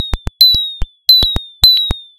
110 bpm FM Rhythm -33
A rhythmic loop created with an ensemble from the Reaktor
User Library. This loop has a nice electro feel and the typical higher
frequency bell like content of frequency modulation. Mostly high
frequencies. The tempo is 110 bpm and it lasts 1 measure 4/4. Mastered within Cubase SX and Wavelab using several plugins.
fm, 110-bpm, rhythmic, electronic, loop